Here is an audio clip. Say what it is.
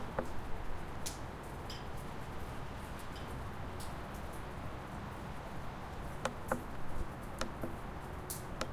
It was recorded at mid-level and it's more like ambient sound.
water, ambient, rain, raindrop, ambience, field-recording, nature, outside